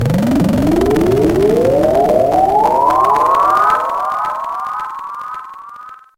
Alternate sine wave created and processed with Sampled freeware and then mastered in CoolEdit96. Mono sample stage nine. This is an extremely controversial sound. I cannot comment on it at this time.